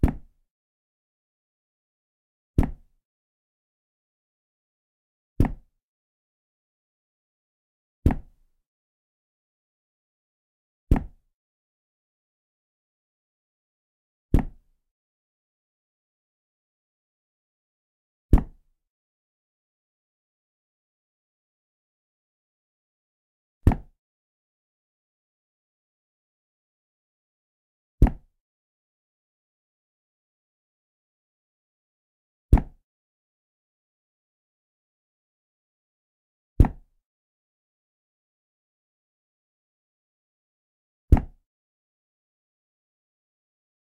Falling Book
falling, ground, boing, cartoon, books, book, fall